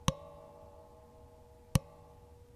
bass guitar pitch2
hitting the neck of the bass guitar with my hand (pitch manipulated)
bass, guitar, manipulated, MTC500-M002-s14, pitch